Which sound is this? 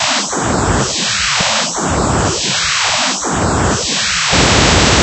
noisy spectral stuff